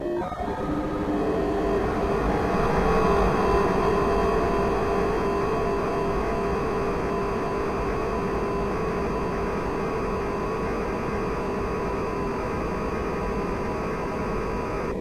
STM4 outro squeal
Demon dial-up. Sounds like old school modems amped. Another small pop at the end i forgot to remove.